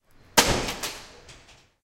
A pencil case falling into the floor
This sound was recorded at the Campus of Poblenou of the Pompeu Fabra University, in the area of Tallers in the corridor A-B. It was recorded between 14:00-14:20 with a Zoom H2 recorder. The sound consist in percussive and low-mid frequency sound produced by the whole pencil case falling and impacting into the floor.